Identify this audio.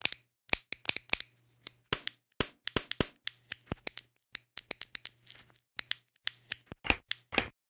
Abstract Percussion Loop made from field recorded found sounds